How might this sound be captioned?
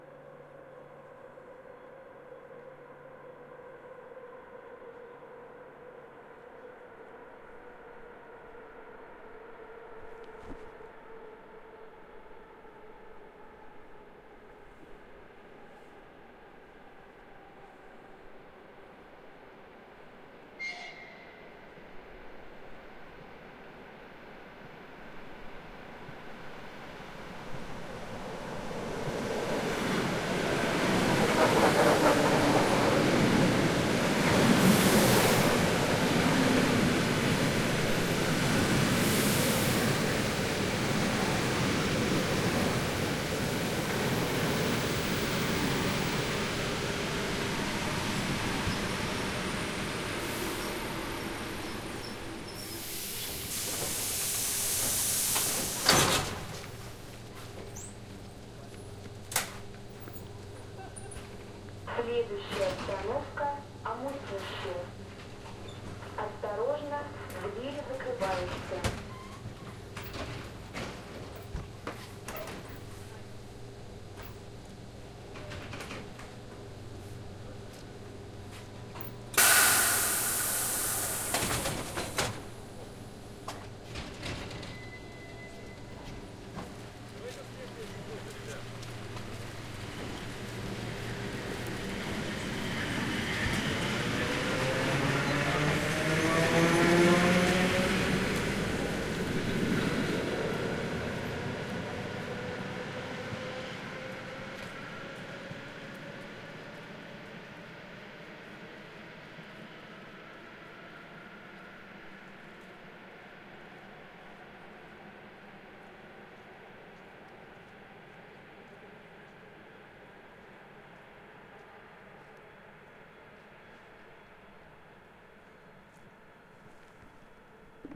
Suburban train arrives & departs, small train station, people leaving & entering the train XY mics
Suburban train arrives & departs from small platform/station located in Moscow area, surrounded by forest.
arrival, departure, passengers, platform, railroad, railway, Russia, Russian, station, suburban-train, train, trains